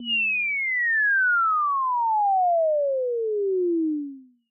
A Comically Short Fall
A fall from a 2 story window.